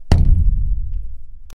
A quite nice sounding bin bang. When we are at school we decided to do some field recordings and this came up. This is natural so you can do whatever with it.
beat, kick, click, tat, hard, bin, bass, hit, tap